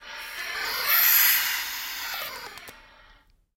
blow cor
blowing into a cor anglais (no reed) and keying up then down